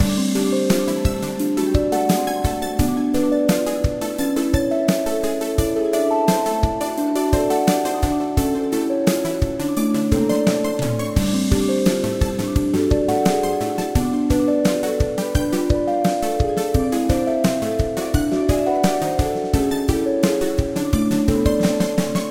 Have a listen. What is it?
This is a short loop intended for arcade games. Created in GarageBand.